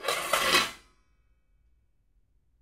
pans banging around in a kitchen
recorded on 10 September 2009 using a Zoom H4 recorder
banging, kitchen, pans